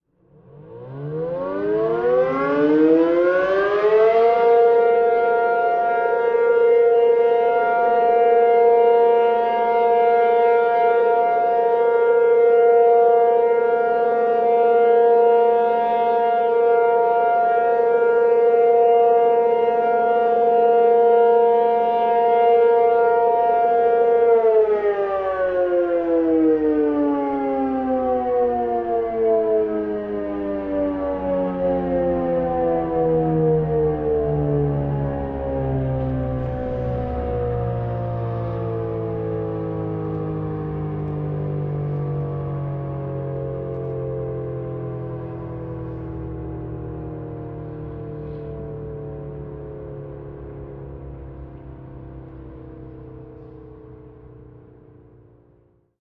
Tornado siren in Streamwood IL
Tornado siren in Streamwood, IL recorded during the monthly test. This version is heavily edited, with some filtering and compression applied, as most of the recording was distorted (I was too close to the siren!), although it should be useable with appropriate filtering to suit your purpose. The actual siren is located a short way north west of the geotag (it should be visible using Google's satellite view). Recorded using a Rode NTG2 into a Zoom H4.